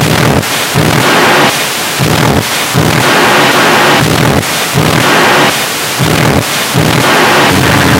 Not sure I can explain exactly what happened here, but uploading as these processed files seem to be popular.
Basically this loop
was opened in Audacity, converted to mono and saved as a raw file, then imported into PaintShop Pro as an image (grayscale).
Bluring and brightness/contrast processing was applied.
Saved as a raw file.
Applied a low cut to remove DC offset and very low frequencies. Applied also a soft cut to high frequencies (was too aggressive otherwise).
Trimmed to the size of the original loop (importing into Paintshop Pro introduced some blank space at the end).
If you have a couple of hours spare I encourage you to try importing sounds to a graphics program, process them, export and import them back as sound.
The results are impredictable and you will drive yourself mad. But you may get a few interesting files out of that 2 hour session...